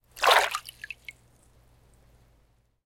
Water Swirl, Small, 9
Raw audio of swirling water with my hands in a swimming pool. The recorder was placed about 15cm away from the swirls.
An example of how you might credit is by putting this in the description/credits:
The sound was recorded using a "H1 Zoom recorder" on 1st August 2017.